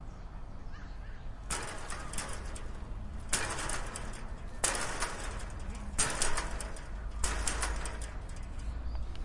Metall Cell
hit, rabitz, metal, cell, outdoor